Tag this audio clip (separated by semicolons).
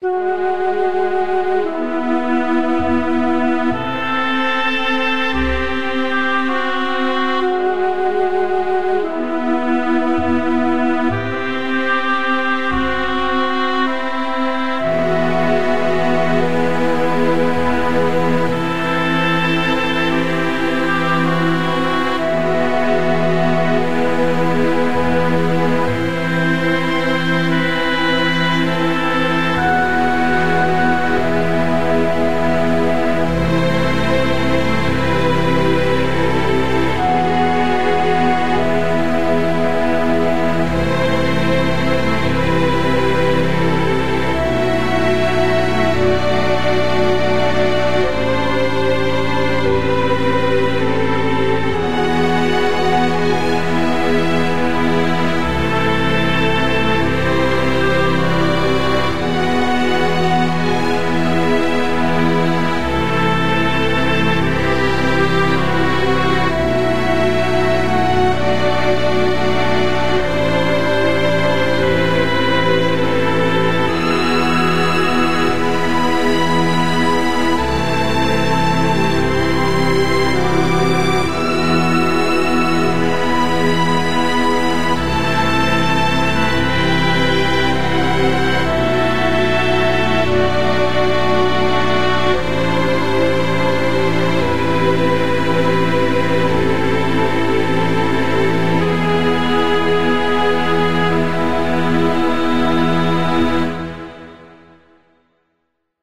autumn; evocative; seasons; spring